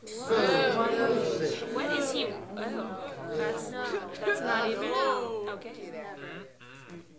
Boo 2 disgusted
Small audience booing and being disgusted